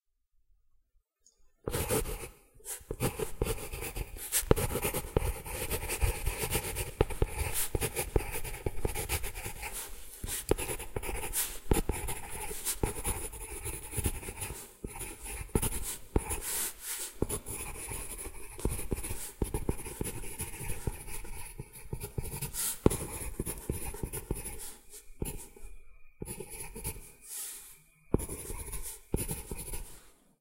Pencil Scratch 1
A pencil scribbling and writing on cardboard.
cardboard, draw, drawing, paper, pen, pencil, scratch, scratching, scrawl, scribble, write, writing, zoom-h4n